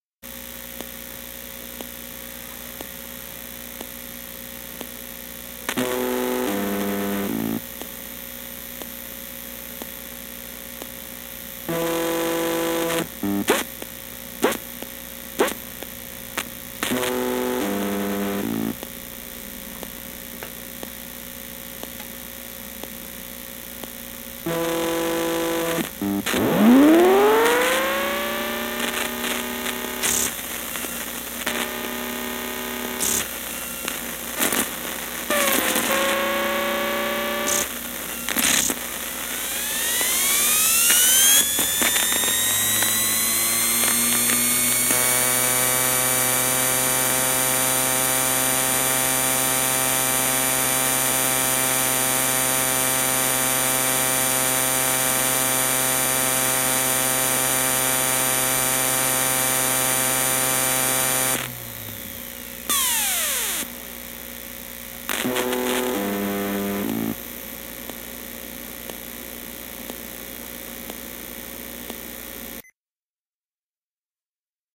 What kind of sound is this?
Broken optical drive spinning up and making glitchy noises.
broken-computer, computer, failure, field-recording, glitch, hum